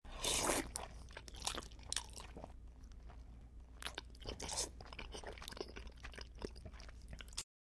Soup slurp
Slurping soup really loud.